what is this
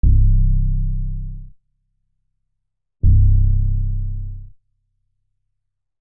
Two slight variations of a sad sounding 808.